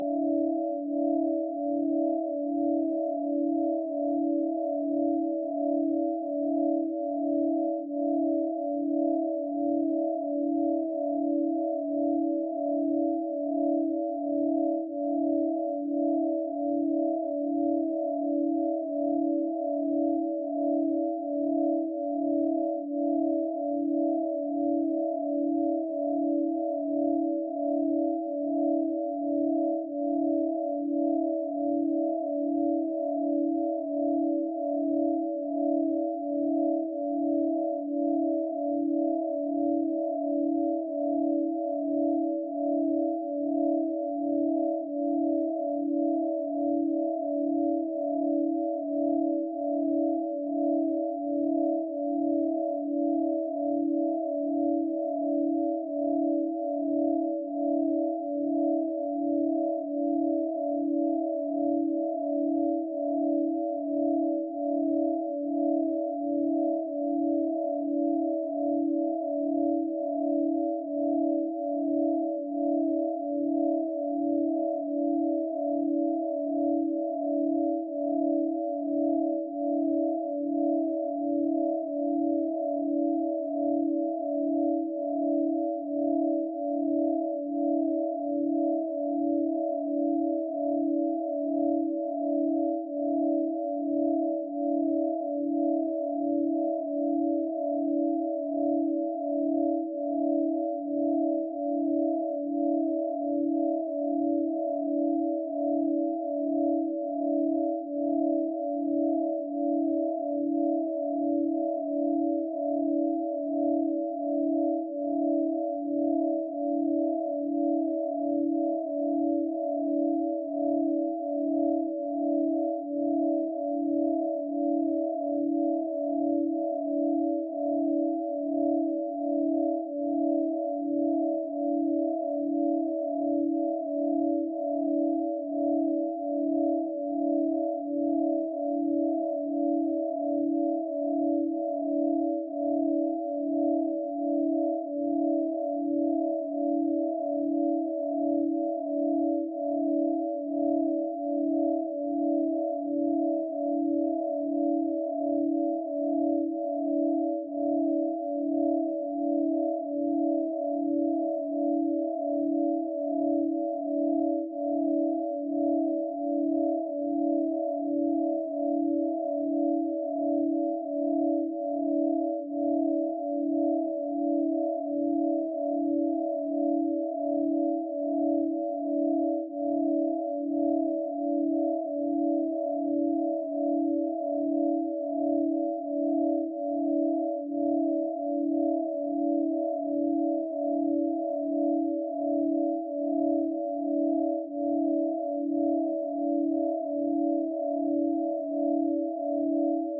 Imperfect Loops 06 (pythagorean tuning)
Cool Loop made with our BeeOne software.
For Attributon use: "made with HSE BeeOne"
Request more specific loops (PM or e-mail)